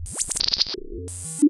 bc8philter4
various bleeps, bloops, and crackles created with the chimera bc8 mini synth filtered through an alesis philtre
alesis-philtre, synth, crackle